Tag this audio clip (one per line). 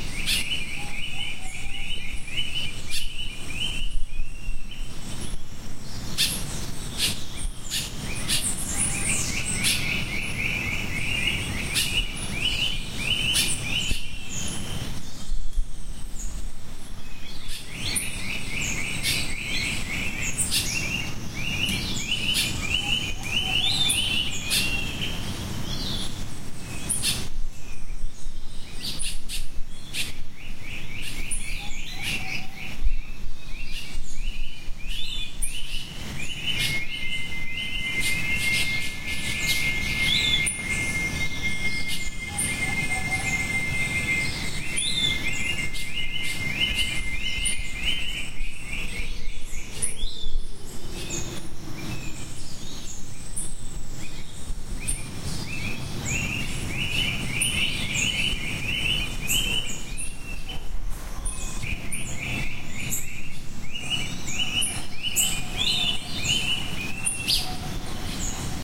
ambient
America
birds
Central
environment
field-recording
forest
insects
jungle
monkeys